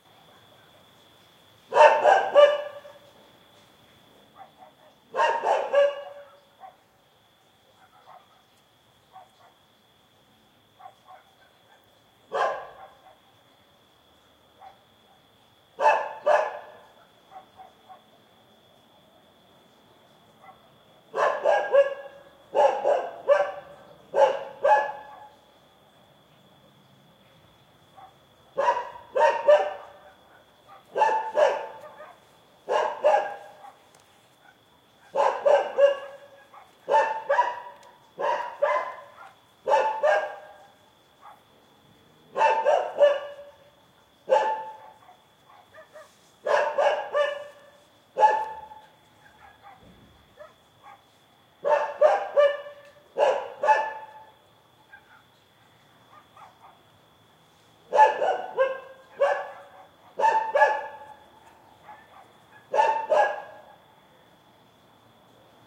a dog barks in the night. Sennheiser MH60 + MKH30 into Shure FP24 preamp, Edirol r09 recorder. Decoded to mid-side stereo with free Voxengo VST plugin